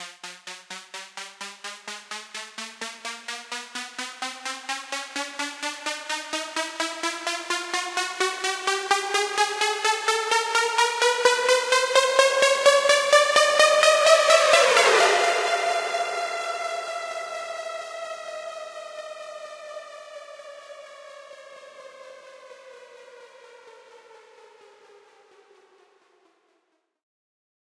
Hyper Saw Riser
sweeping, fx, riser, rising, sweeper